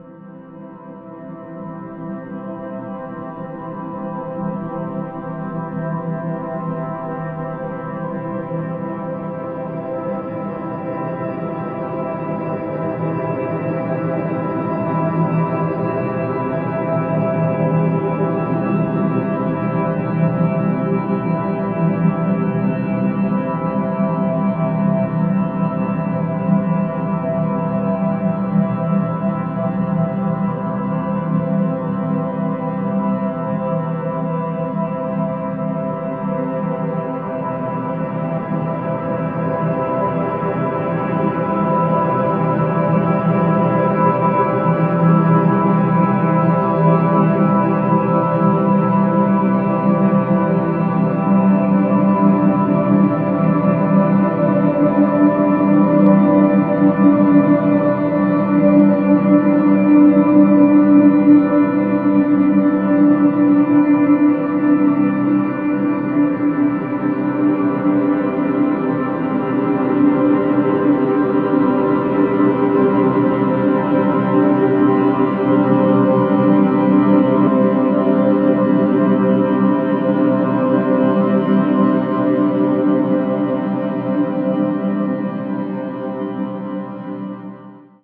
Ambient Piano Drone
Stretched out sounds of a piano. Warm and dreamy. Edited with Audacity.
dreamy, ambient